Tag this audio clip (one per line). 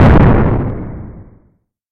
explosion
noise
white